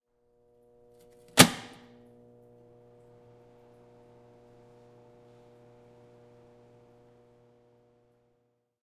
Switch.Big.Power
Big Breaker switch for one of three phases of power coming into an industrial metal shop. I'm pretty sure it was a 50 amp breaker.. You can hear a transformer buzzing in the background and a fan start once the switch is thrown. Fairly roomy (being a large concrete garage type environment) but usable. Recorded on a Zoom h4.